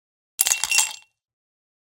Ice Clink in Empty Glass
Ice cubes dropped and clinks into an empty glass
ice, glass, drink, beverage, cubes, pour, empty, crack, cold, clink